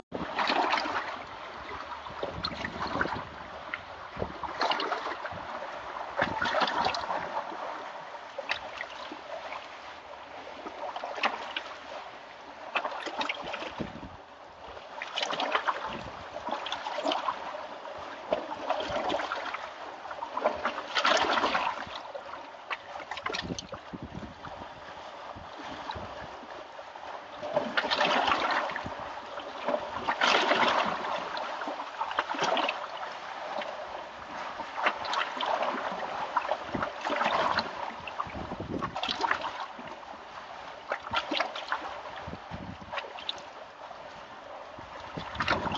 The ocean waves under the dock in Astoria Oregon.